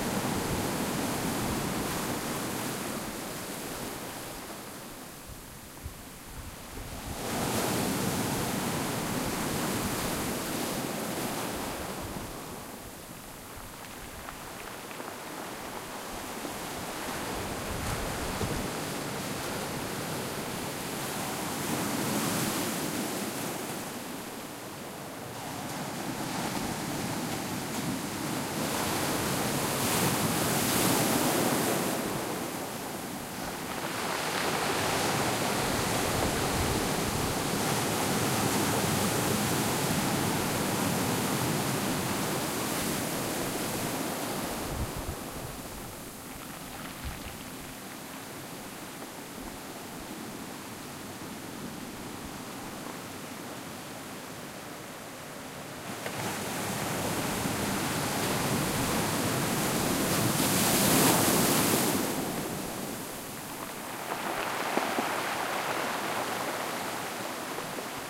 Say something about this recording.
San Simeon Beach at Midnight 2
Taken at the Pacific ocean shore.